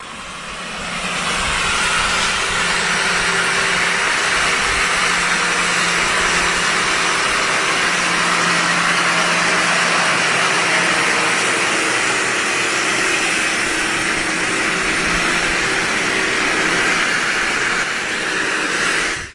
sobieszow street wash car290610
29.06.2010: about 17.00. the noise produced by the street washing car. the Karkonoska street in Sobieszow - the district of Jelenia Gora (Low Silesia region in Poland).
field-recording, jelenia-gora, low-silesia, noise, poland, sobieszow, street, street-washing-car, swoosh